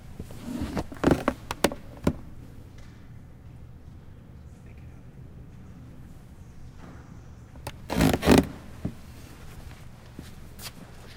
wood creak low sit down on loose park bench and get up
bench, creak, down, get, low, park, sit, up, wood